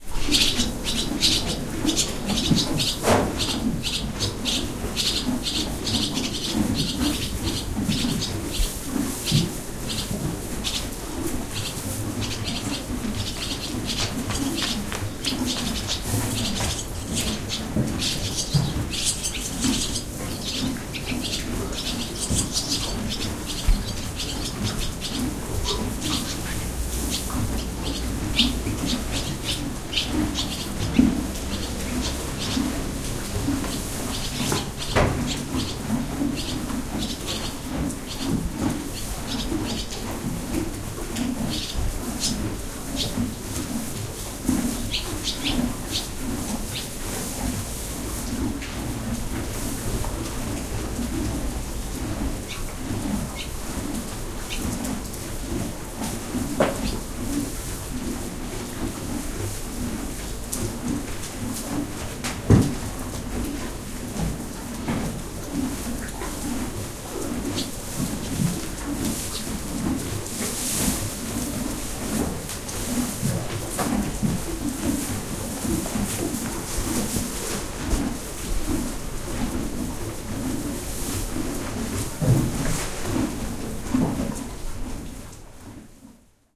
recorded with a olympus LS-11 in a barn
horse, barn